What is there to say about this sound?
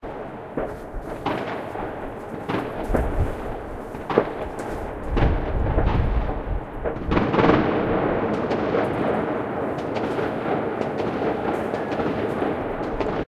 Fireworks In City 2
Sounds of new years fireworks in city
blows, fields, bangs, shots, fierworks, new-year, dield-recordings, blasts